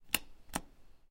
A push of a button.
button, click, press